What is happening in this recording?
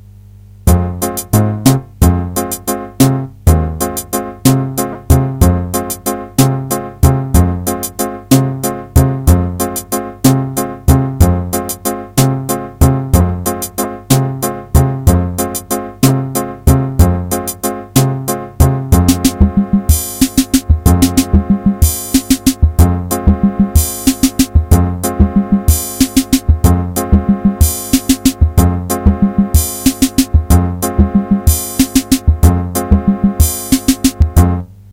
Old plastic synth Arpeggion. Bontemp Master
master, synth, tempi, bon